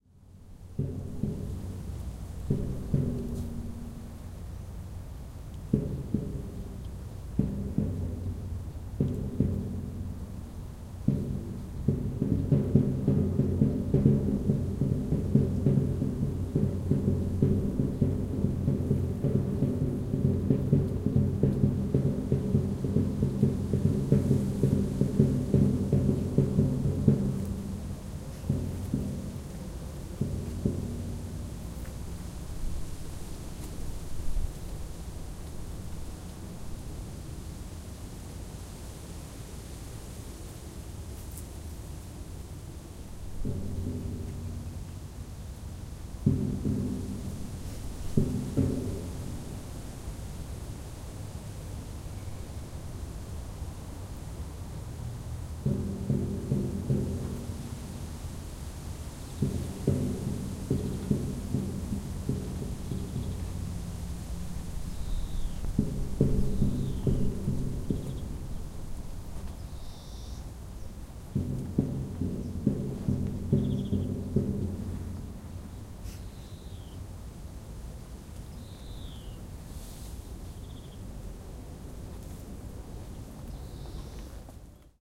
warta ambience1 300511
30.05.2011: about 19.30. Chwialkowskiego street. the general ambience: the swoosh of leaves, Warta football team supporters drumming, some birds. Poznan in Poland
birds, drum, drumming, fans, field-recording, leaves, poland, poznan, sport-club, wind